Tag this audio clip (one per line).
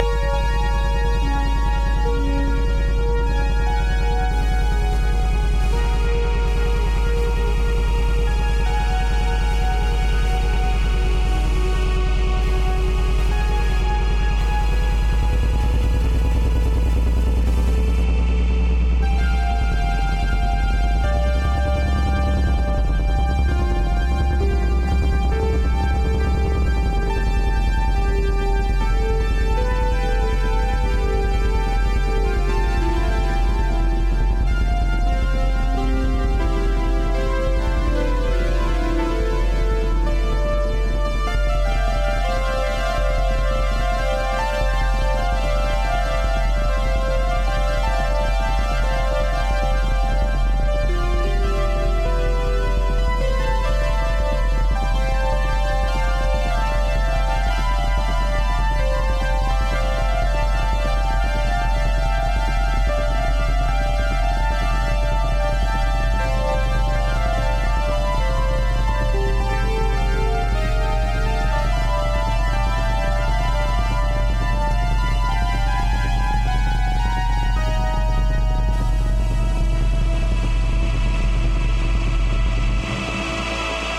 beat
loop
volca